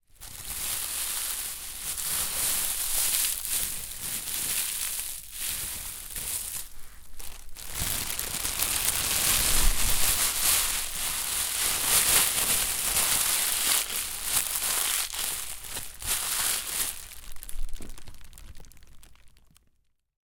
Two plastic bags
Miked at 6-8" distance.
Two different plastic bags being crinkled.
foley, bags